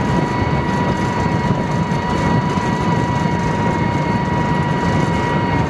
Driving Tank Engine
A tank driving in the sand.
machine, seamlessly, repetitive, engine, tank, vehicle, seamless, gapless, game, game-sound, warfare, army, war, loop, sound, technology